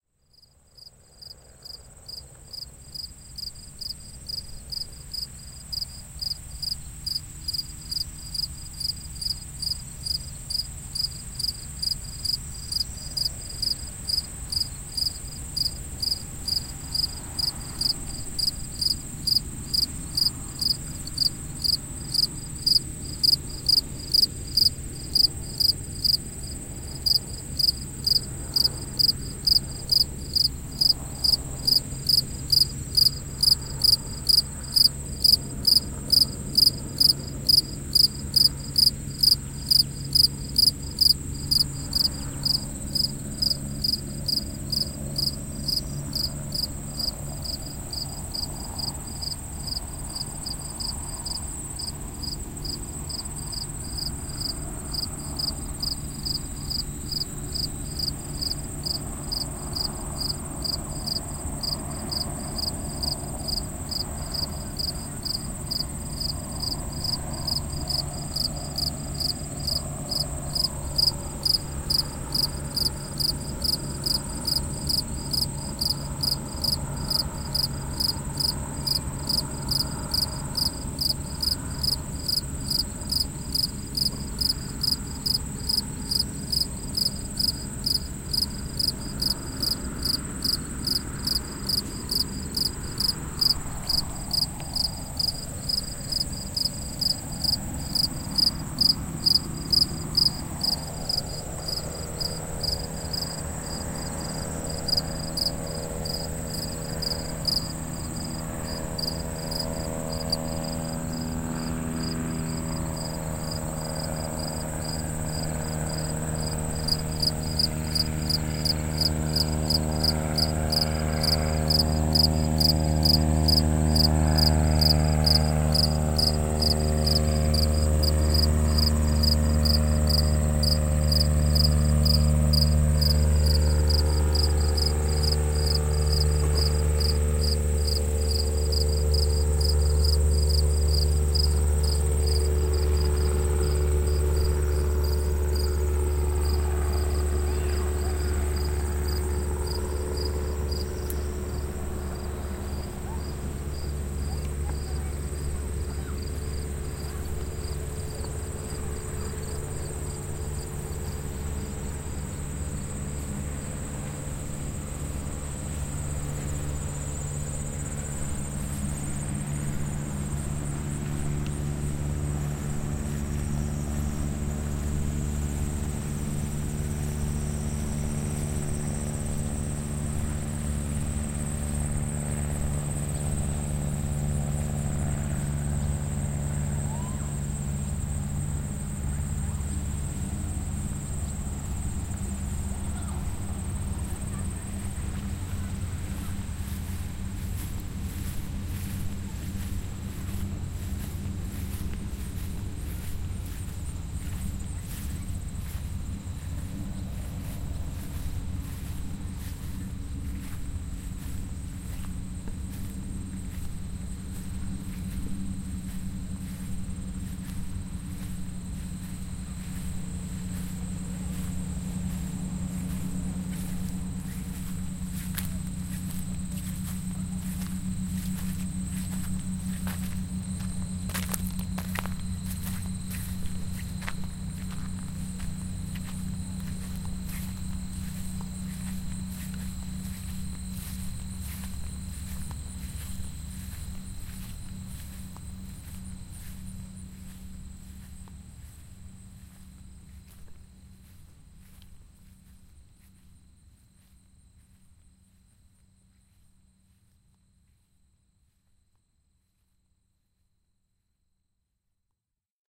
soundscapes at hammerklavier's neighborhood